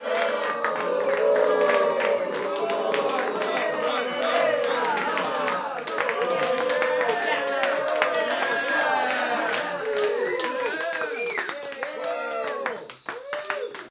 Cheering Small Room
Small audience cheering and clapping. Recoded in a small room with a pair of AKG Precision 170.